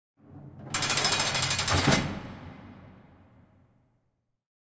Prison Cell Door sound effect
Prison doors closing
background-noise, jail, prison